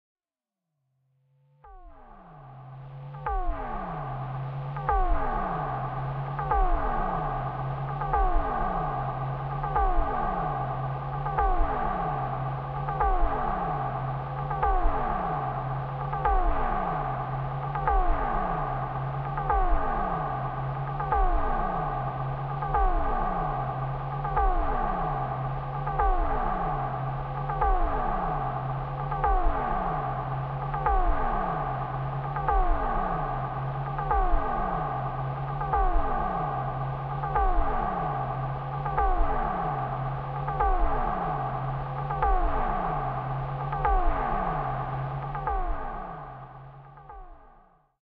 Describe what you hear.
Distant Signal Drone
A distant, reverberant sounding drone like a lost signal in space. Key of C, 80bpm.
Pad, Signal, Drone, Pulse